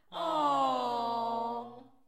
aww-cute-reaction
sound of 4 people saying aww as if they are reacting to cute cat-videos or something adorable